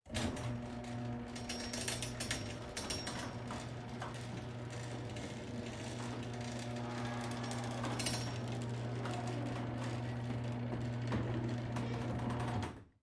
mechanical garage door closing, near miked, creak, squeak, quad 1
Mechanical garage door closing. Creak/squeal iat the end. Left, right, Left surround, right surround. Recorded with Zoom H2n.
squeak, chain